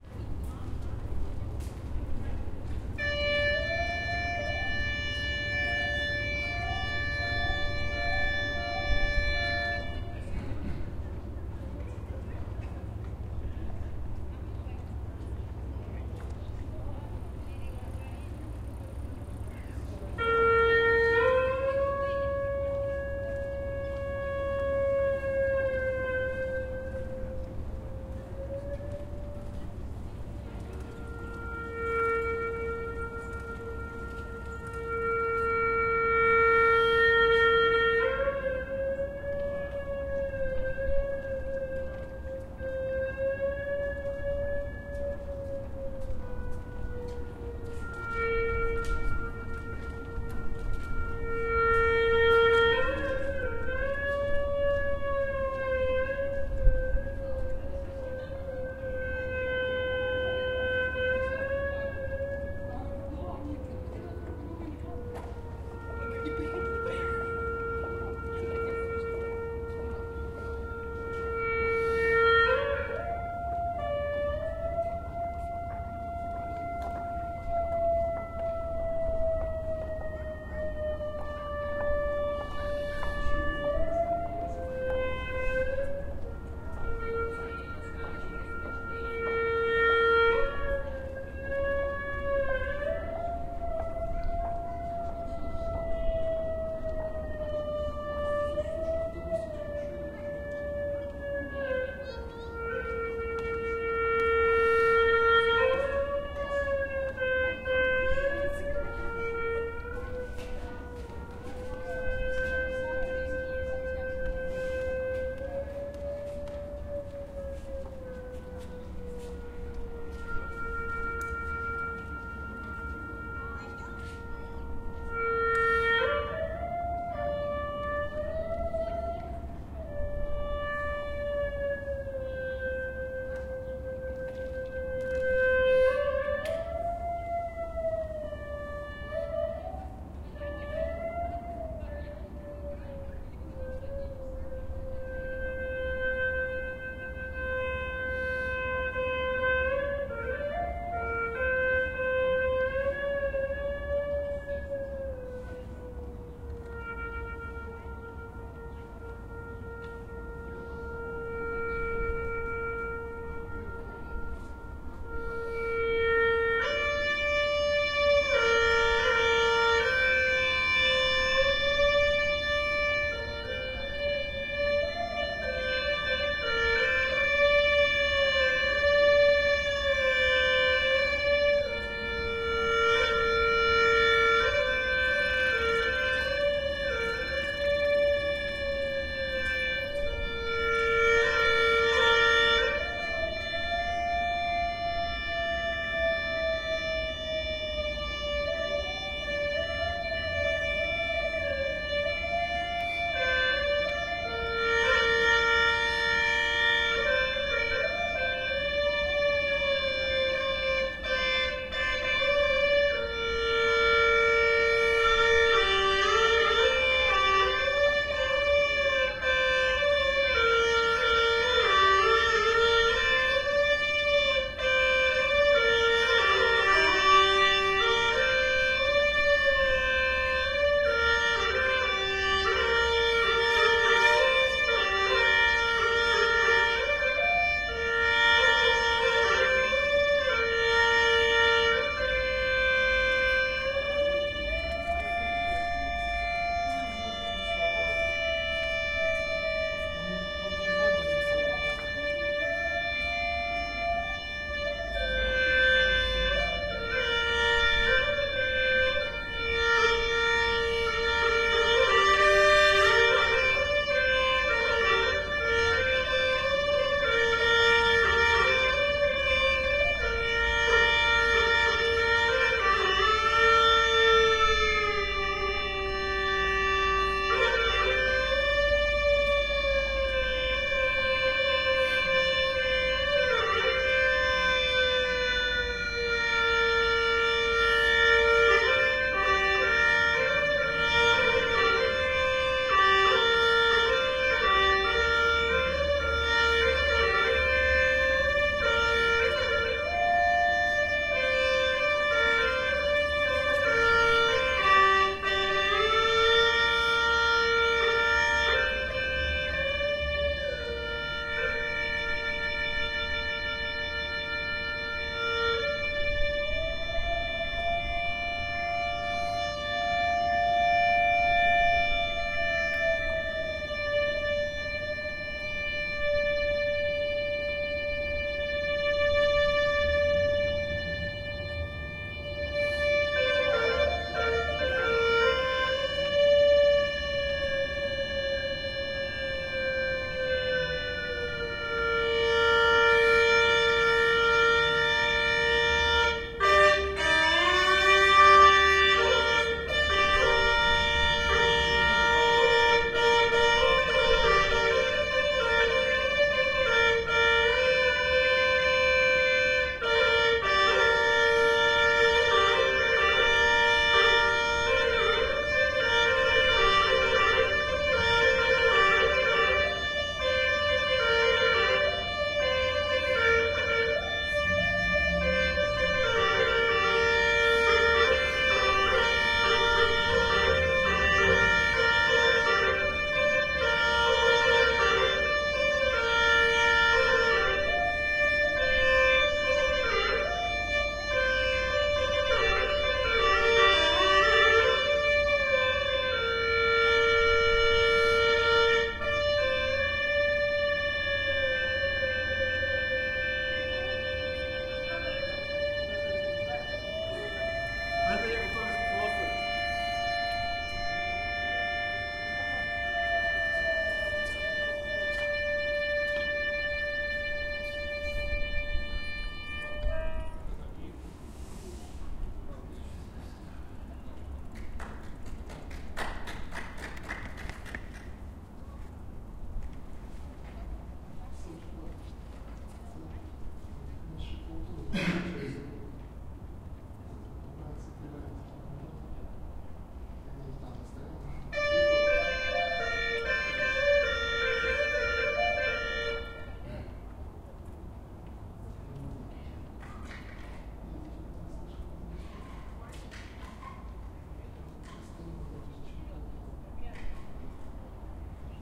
Street Hulusi
Musician plays hulusi improvisation under the city gate.
Recorded 27-05-2013
XY-stereo, Tascam DR-40, deadcat.
The hulusi (traditional: 葫蘆絲; simplified: 葫芦丝; pinyin: húlúsī) or cucurbit flute is a free reed wind instrument from China. Hulusi on wikipedia
open-air, people, china